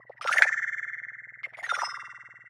alien swamp creature
manipulated sounds of a fisher price xylophone